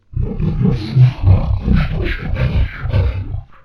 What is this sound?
Alien Voice Speak
Layered voice with effects applied in Audacity.
daemon, dragon, alien, evil, scream, ur-quan, monster, roar, devil, Cthulhu, cutethulhu, lion